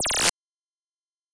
raygun very short